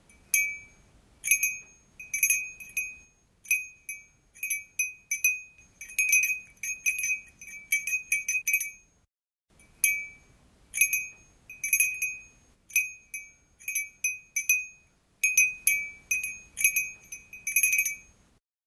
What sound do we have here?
Two small, 20mm diameter reindeer bells mixed into single file. Recorded with Zoom H1
Bell, reindeer, small-bell